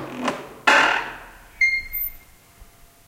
Series of squeaky doors. Some in a big room, some in a smaller room. Some are a bit hissy, sorry.